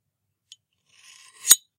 slow cut
Knife sounds recorded for your convenience. they are not the cleanest of audio, but should be usable in a pinch. these are the first folly tests iv ever done, I hope to get better ones to you in the future. but you can use these for anything, even for profit.